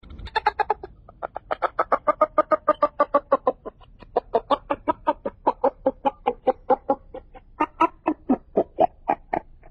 Evil laugh
I recorded this with my android phone & a voice app, although this is not a voice. Truthfully.
laugh, oh, scary, devils, laughs, entity, laughing, turns, devil, evil, bad, normal, uh